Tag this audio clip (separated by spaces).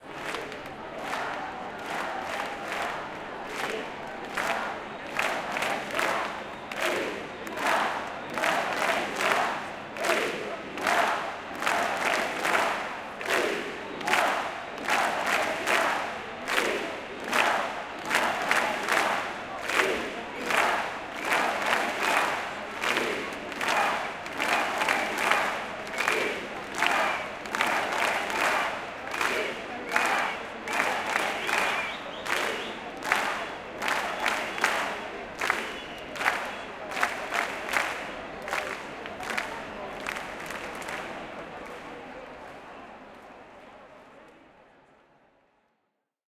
2 setembre inde 11 independencia 2021